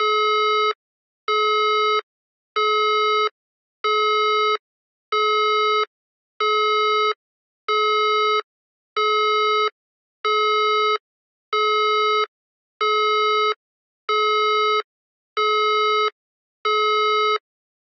A clone of the Simplex QE90 warning tone, popular in Australian emergency systems. This one means stop what you're doing and pay attention!
Made in software with some speaker modelling for a theatre show
warning, tone, australia, evacutation, emergency